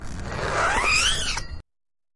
Big Zipper
Scraping a plastic canvas with fingernail from right to left. Second take. This is one of those grill covers you can protect your grill with. Recorded outside, close up with TASCAM DR-05, cropped in Audacity.